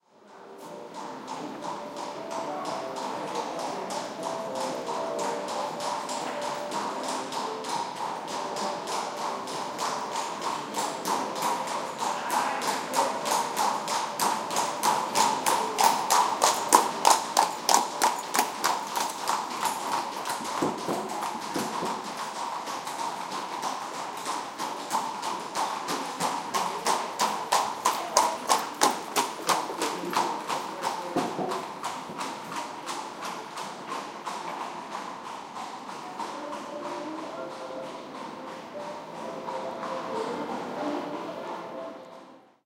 20151207 two.horse.carriages
Two horse drawn carriages passing from left to right, distant street noise in background. Soundman OKM into PCM M10 recorder
binaural, city, field-recording, horse, south-Spain